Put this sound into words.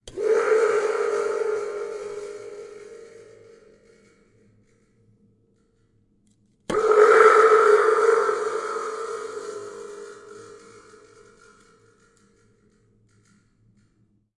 Thunder Toy (Clean)
toy, thunder, sac, spring, foley, sounddesign, design, nature, lightening, rain, mus152, garcia, boom, sound, ambiance